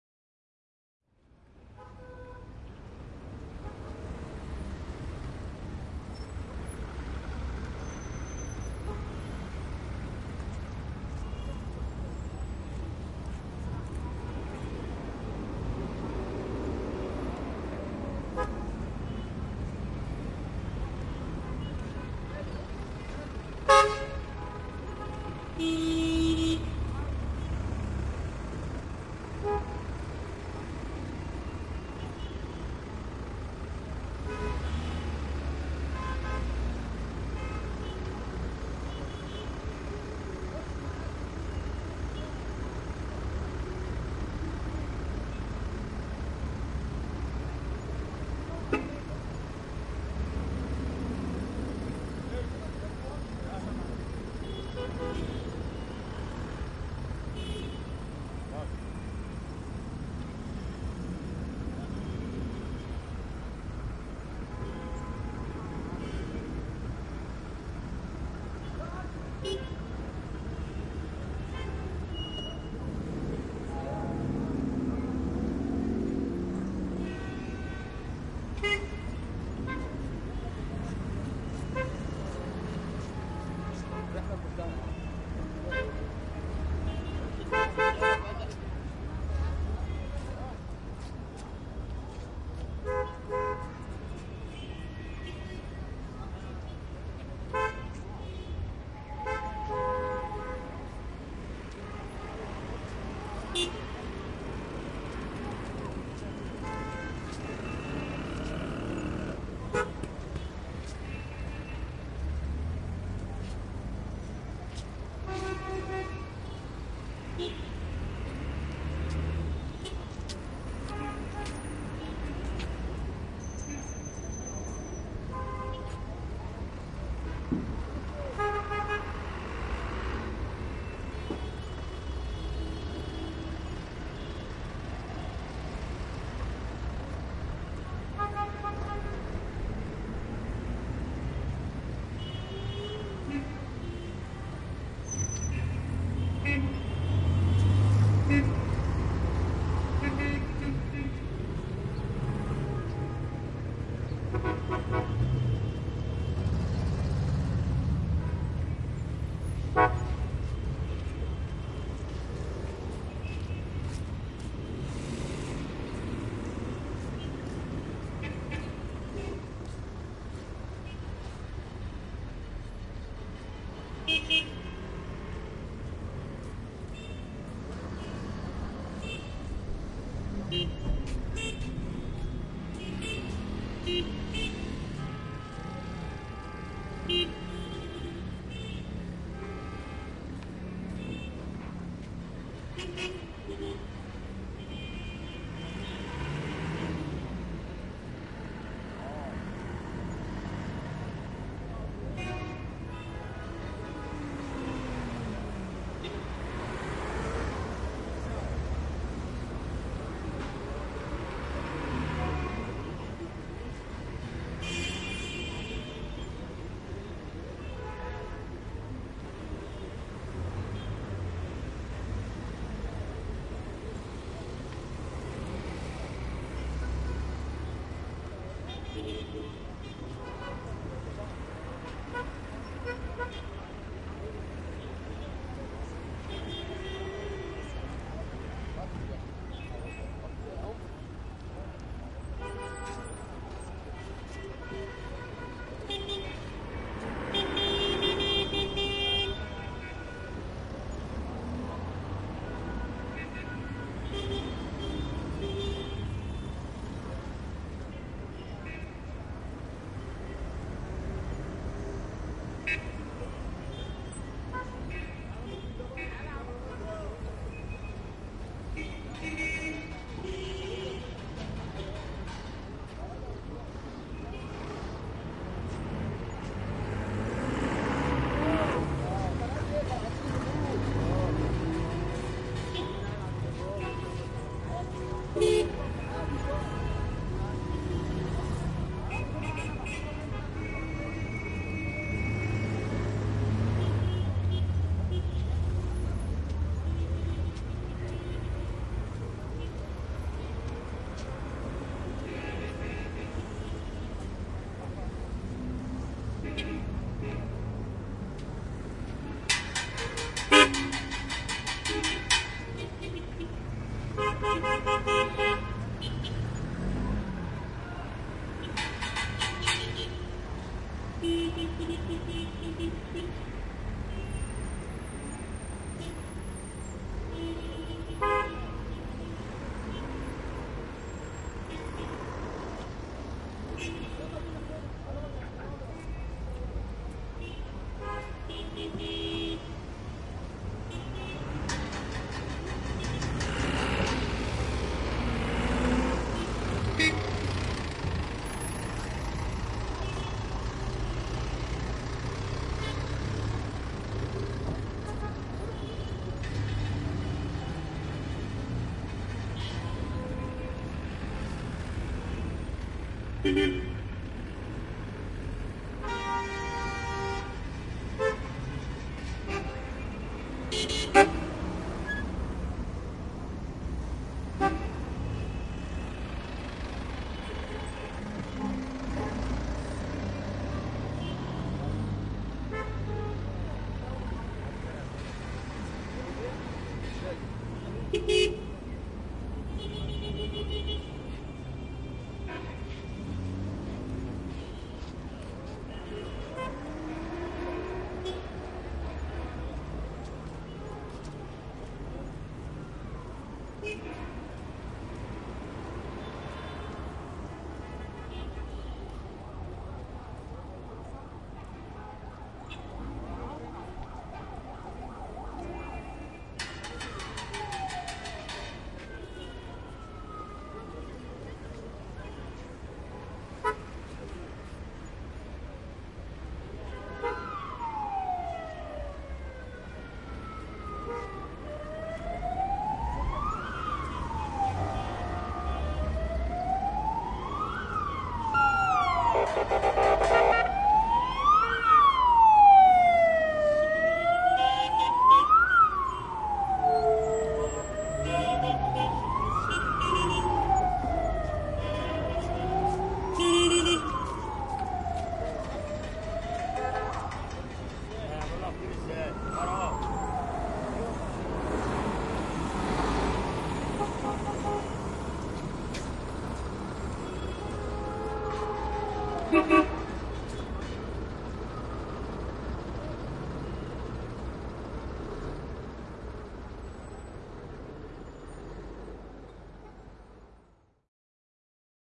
Alexandria Traffic 2
2014/11/22 - Alexandria, Egypt
3:30pm Traffic at a crossroad.
Gaz reseller (hitting a gaz bottle). Police car. Horns.
Taxi offering his services.
ORTF Couple with windscreen
Alexandria, Crossroads, Egypt, Gaz-reseller, Horns, Muezzin, Pedestrians, Police-car, Taxi, Traffic